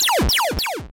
8-bit few "pew" effects
A couple of 8-bit "pew" effects from an item being collected, etc.
Created using the Audio Lab in Anvil Studio version 2015.04.02